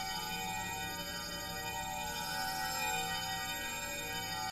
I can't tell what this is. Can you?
Light Cast Skill Loop ( Guidingbolt )
aura, guidingbolt, cast, spell, Light, chimes, bell, chime, paladin, magic, healer, heal, shimmer, holy, bowl